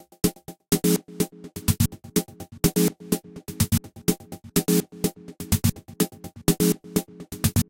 Drums loop Massive 120BPM-04
120bpm, drums, loop